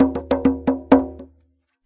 tambour djembe in french, recording for training rhythmic sample base music.
djembe, drum, loop